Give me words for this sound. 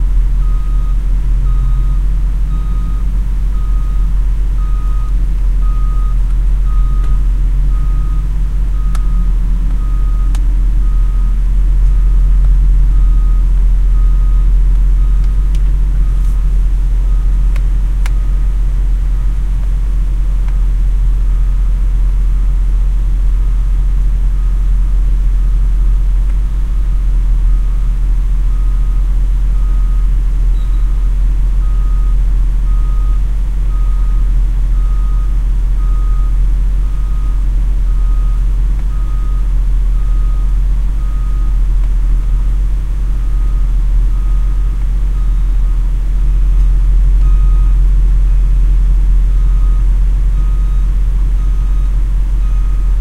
A mostly loopable sound that consists mostly of a reversing truck's beeper. This recording took place at our local Wendy's drive through window, as we were waiting for our food to be delivered to the window.